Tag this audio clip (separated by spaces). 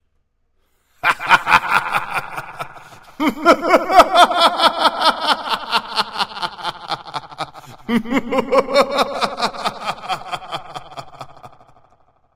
laugh crazy